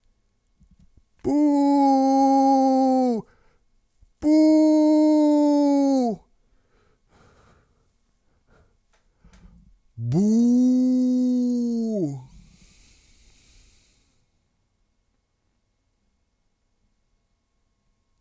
AS076768 boo
voice of user AS076768